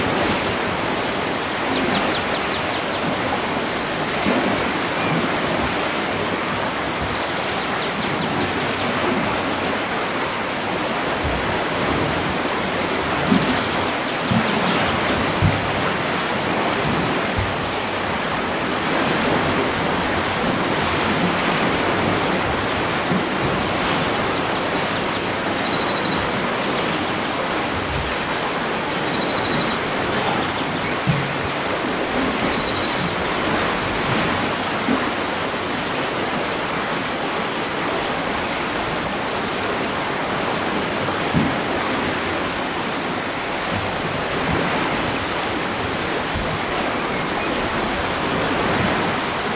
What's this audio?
Field recording of a weir by the German city of Leipzig, recorded on a summer day with a Zoom H2 with a Rycote windscreen, mounted on a boom pole.
This recording is the surface noise complementary to the hydrophone recording clip 140608_Teilungswehr_Sub_01